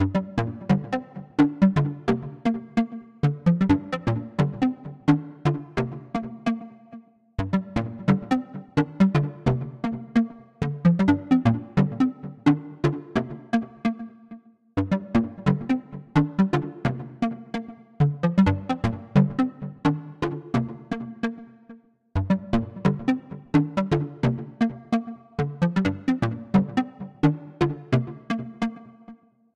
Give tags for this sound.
Dance; Drum; EDM; Electric-Dance-Music; Electro; FX; HiHat; House; Keyboard; Loop; Minimal; Percussion; pop; Synth; Techno

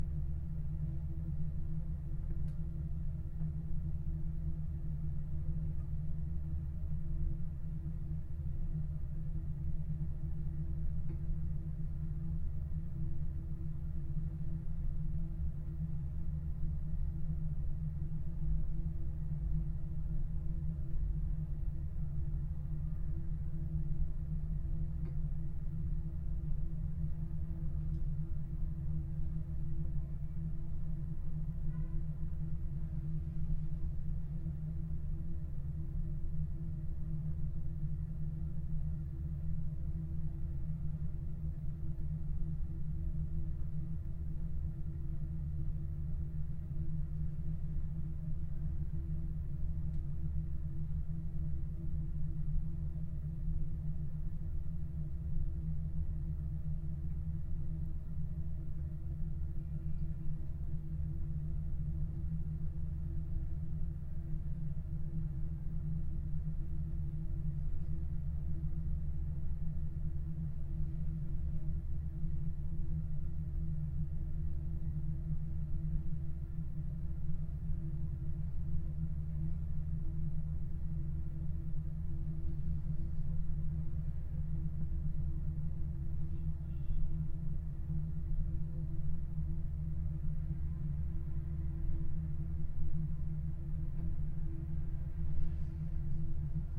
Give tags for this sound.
Bathroom; Roomtone; Ventilation

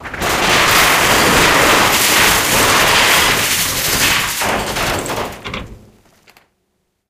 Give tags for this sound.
collapse; stricken; metal; crash; multistricken

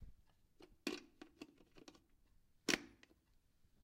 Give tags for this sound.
OWI; Plug; Plugging; plug-in-charger; plugs; wall-plug

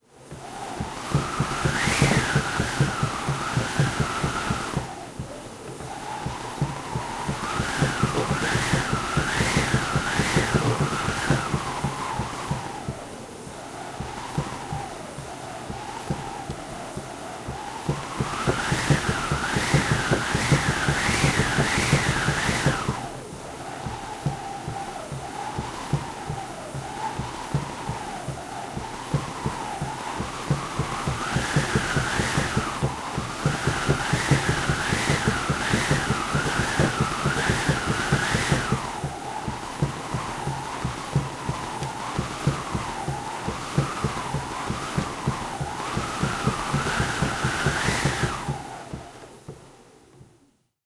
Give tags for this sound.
Aeoliphone; Finnish-Broadcasting-Company; Friction; Friction-idiophone; Hankaus; Instrument; Instrumentti; Kitka; Soundfx; Tuuli; Tuulikone; Wind; Wind-machine; Yle; Yleisradio

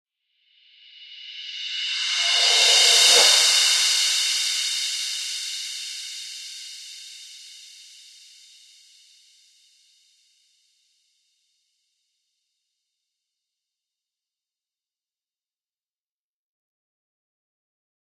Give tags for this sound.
cymbal; cymbals; echo; fx; metal; reverse

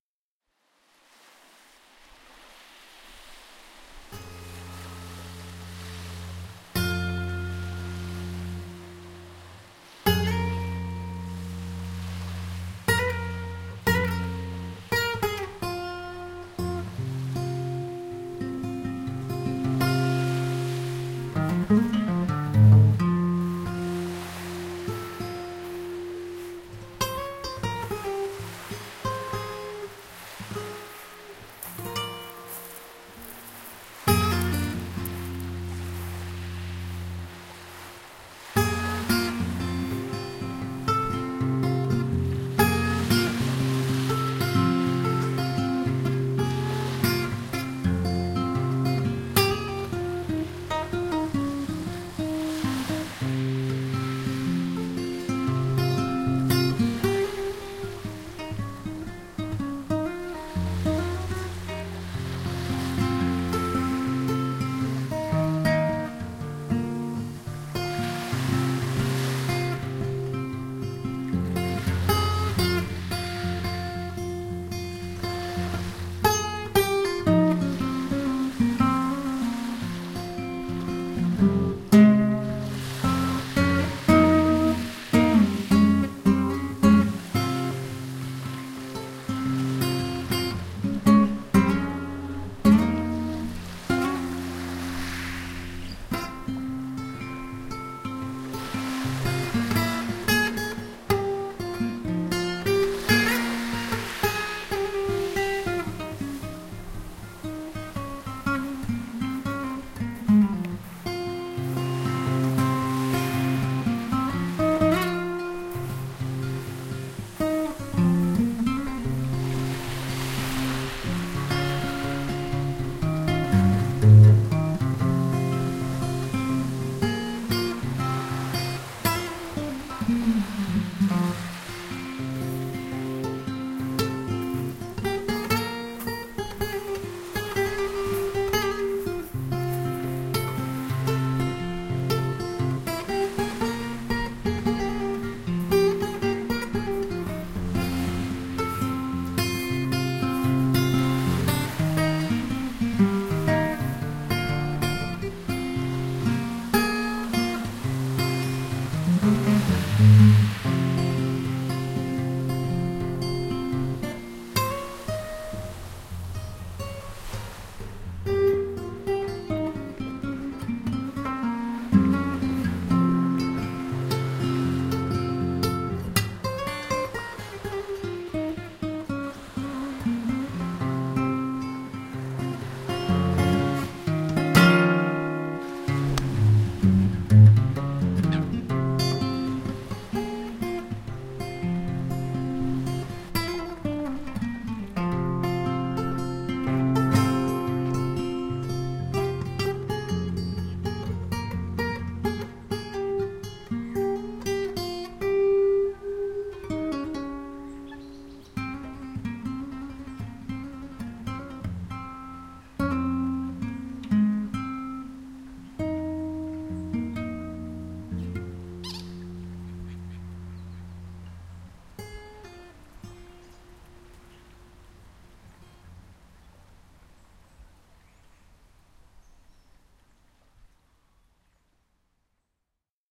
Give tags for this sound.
sea; acoustic; guitar

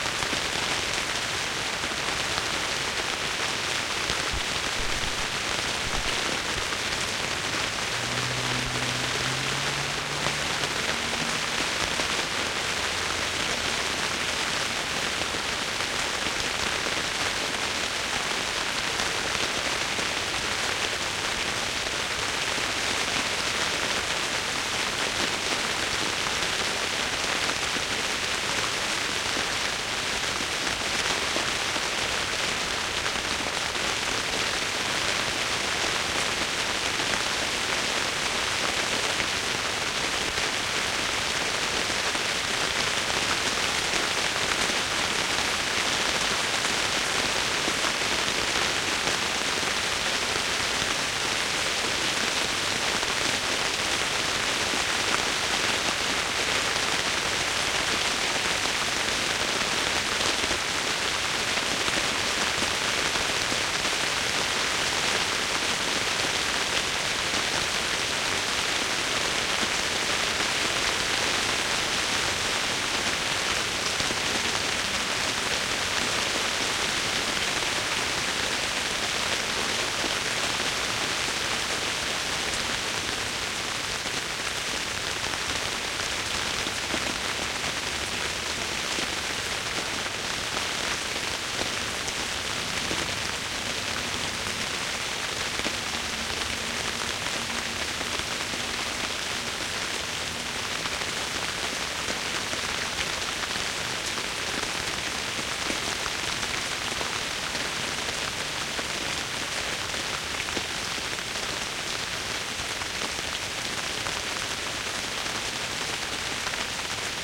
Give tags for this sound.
pavilion; tent; rain; drip; drizzle

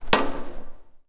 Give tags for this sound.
impact; wood

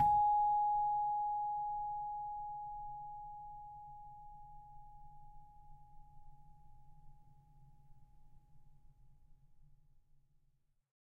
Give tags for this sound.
samples; celeste

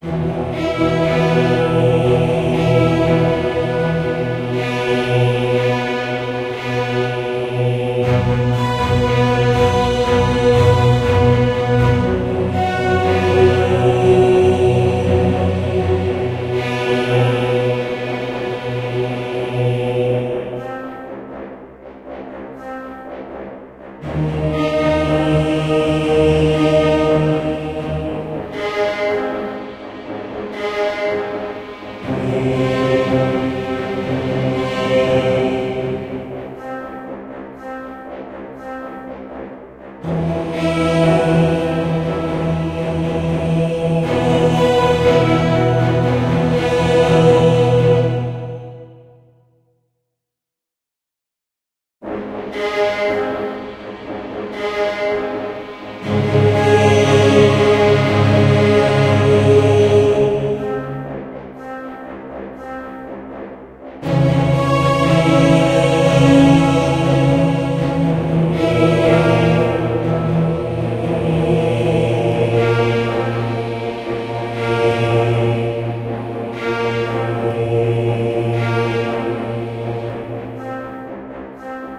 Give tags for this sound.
Sound; Movie; Classic; Music; Strings; Cinematic; Orchestra; Drama; Angels; Snow; Mastered; Acoustic; Bass; Song; Orchestral; Film; Modern; Brass; Sounds

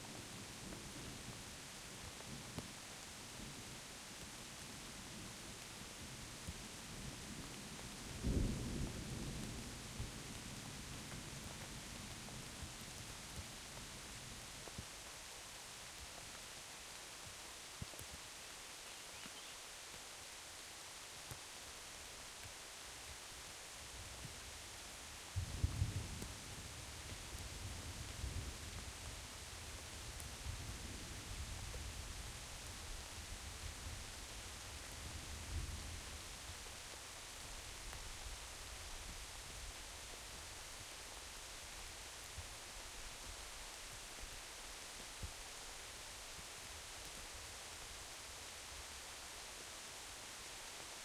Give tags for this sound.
drops; forest; rain; shower; thunder; tree

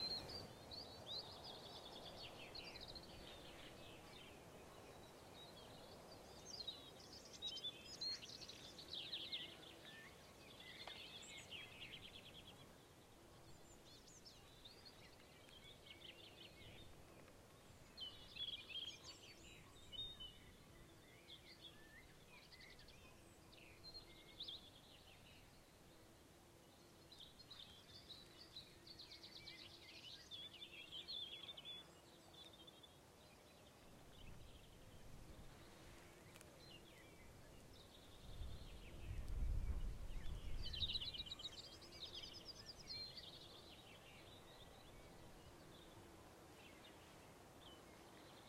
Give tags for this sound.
ambient; birdsong; general-noise; insects; wildlife; woods

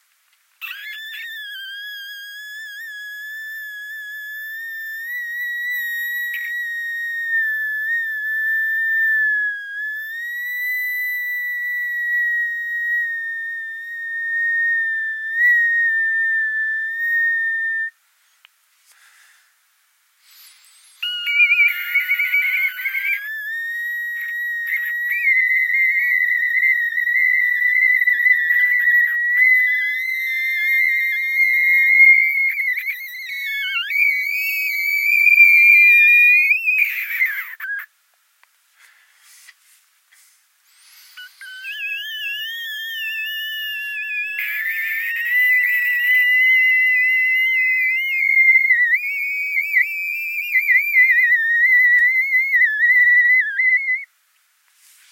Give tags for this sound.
fx; harry-potter; high-pitch; mandrake-scream

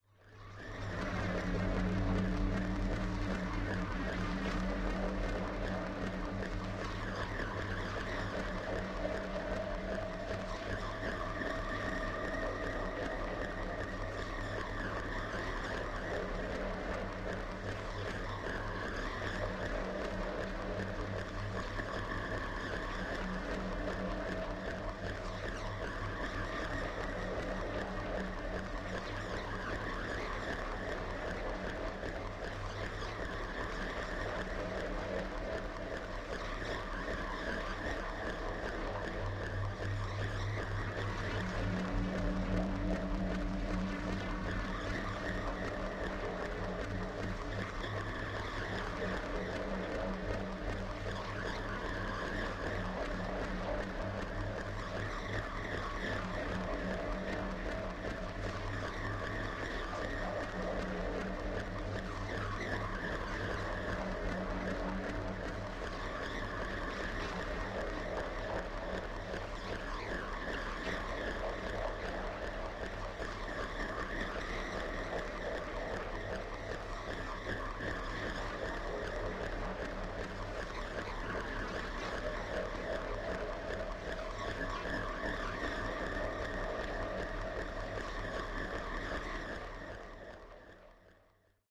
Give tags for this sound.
Cold; Drone; Machine; Sci-fi

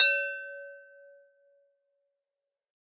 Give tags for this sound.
bell; clink; crystal; giant; glass; unusual; wine; wine-glass; wineglass

